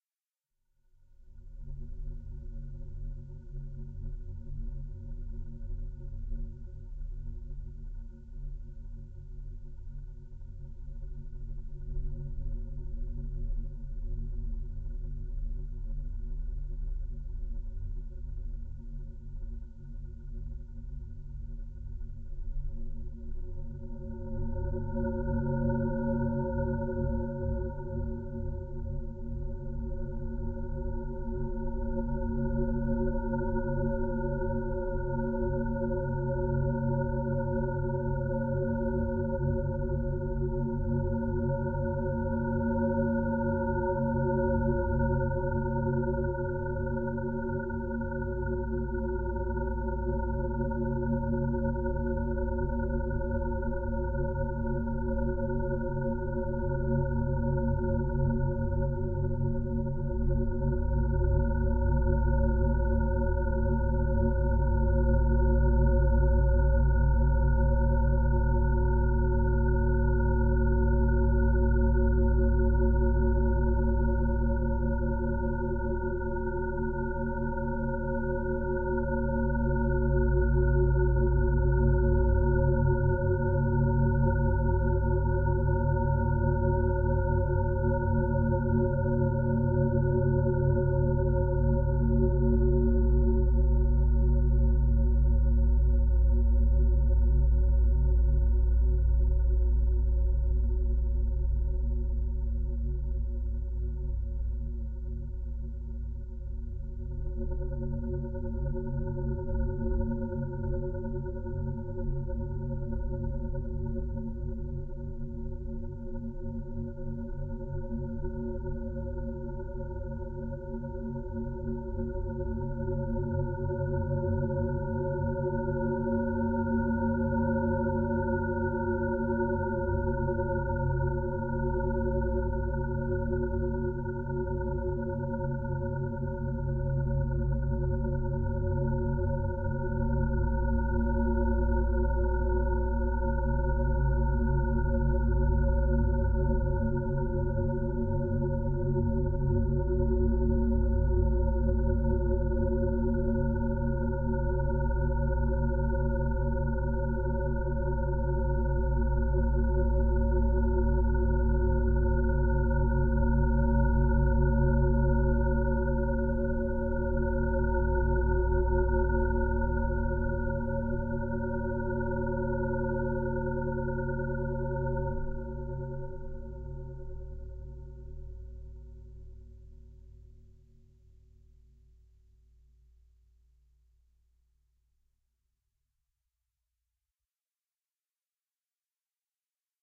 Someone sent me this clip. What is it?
Long drone, chimes
Slowly ascending and descending drone with rising and falling artificial harmonic feedbacks - very, very deep bottom end. This is a heavily processed sample that was constructed from the digital input of my Ibanez TCY10 acoustic guitar running directly into my computer via an Audigy2ZS device.